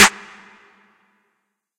Custom Big Bank Snare - Nova Sound
Southern Hip Hop Trap Style Drums. Sound Designed by Nova Sound
We need your support to continue this operation! You can support by: